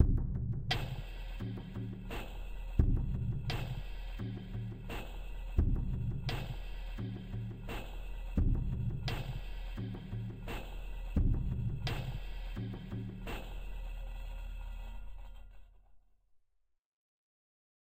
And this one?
Stormy DnB
A beat made with a DnB kit and some Stormdrum samples.